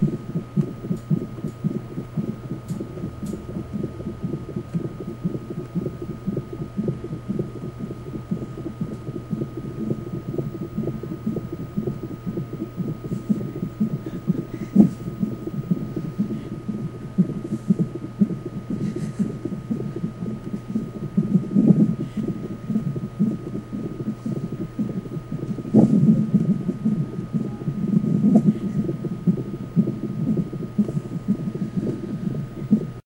Sounds leading up to the birth of a baby recorded with DS-40.